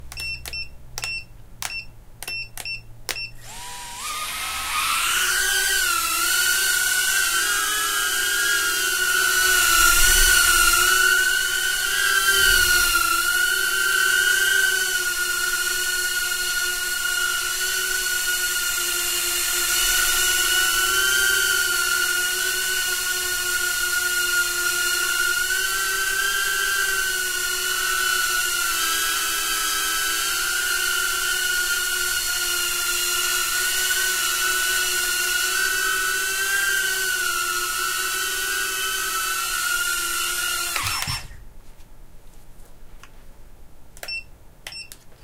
Tiny Hawk 2 short flight
Timestamp YMD : 20220130
Recording equipment : Zoom H5 stock capsule mic
Description of the sound : TinyHawk 2 micro quadcopter flying, microphone set at waist level and I'm flying infront of it, more or less hovering.
Recorded indoor.
Hope you enjoy :)
quad, fpv, indoor, micro, drone, micro-quad